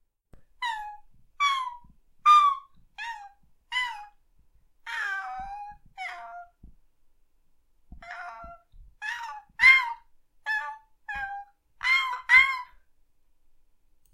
dog whining 2
whine, whining, Czech, dog, CZ, Panska